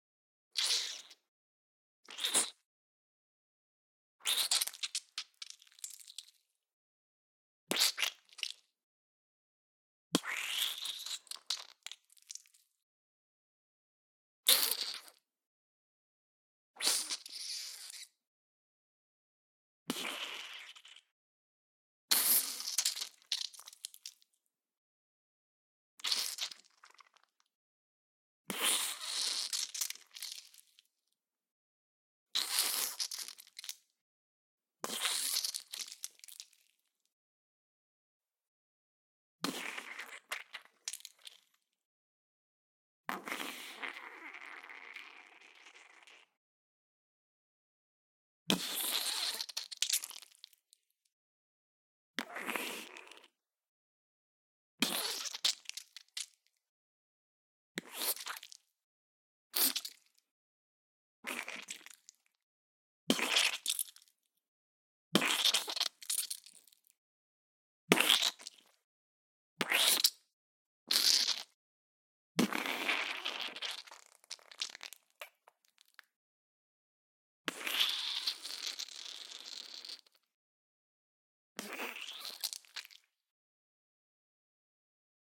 Squish Foley 01
Foley sounds made with mouth.
CAD E100S > Marantz PMD661